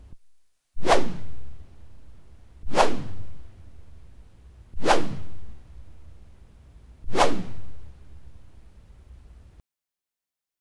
f Synth Whoosh 21
whooshes whoosh swoosh Gust
whoosh, Gust